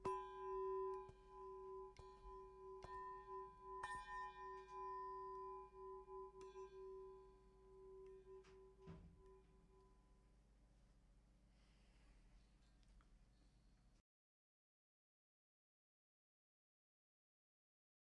Heatsink Large - 14 - Audio - Audio 14
Various samples of a large and small heatsink being hit. Some computer noise and appended silences (due to a batch export).
bell, heatsink, hit, ring